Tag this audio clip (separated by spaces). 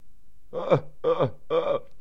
1 laughter odd